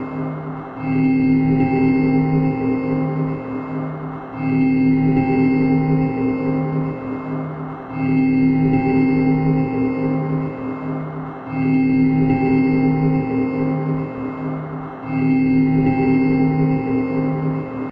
Synth Wave
Manipulated, loops, music, beep, loop, song, electronica, bop, created, beat, electronic, Sample, sound, track, dance